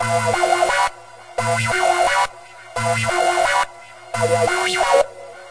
FunkySynth Chord-wheel-wha 087bpm
sequenced chords producing different 'wha' with the modulation wheel.
1 bar, 087 bpm
The sound is part of pack containing the most funky patches stored during a sessions with the new virtual synthesizer FM8 from Native Instruments.
rhythym, electro, funky, alert, chord, abstract, synthesizer, wha, riff, loop, sequence, soundesign, wha-wha, synth, funk